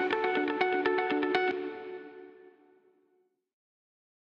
Triplets delay
This is a simple loop of me playing some nice triplet delay textures on guitar. This is a stereo file with 2 guitars panned on the right and left, playing the same pattern in different octaves, offering a nice atmosphere. This was recorded with a Hagstrom F200P (awesome P90 style pickups) on a VOXAC15, using a BOSS DD7 for the delay and a Beheringer Reverb Unit (yeah, I love the lo-fi sound of that cheap box!)